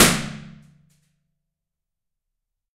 ir,balloon burst,hotel shower,glassy resonance,stereo,em172
Impulse response file for use in convolution reverbs.
I recommend Convology XT by Impulse Records, free and easy to use.
Recorded in a glass box of a hotel shower. And we all know glassy resonant shower reverb is the best.
Recorded with Primo EM172 omnis plugged into a Sony D100.
Self-reminder to bring more balloons next time.